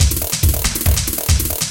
Here Piggy 140
drum, Beats, loops, drums, electronic